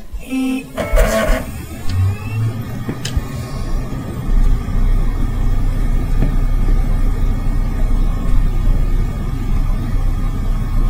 A server starting up.